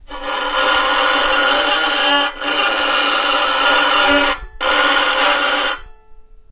violin distortion 01
A short and abstract violin distortion sound. Recorded with zoom h4n.
Abstract, bow, clean, dist, distortion, erhu, fiddle, Short, string, string-instrument, unprossessed, viola, violin, zoom-h4n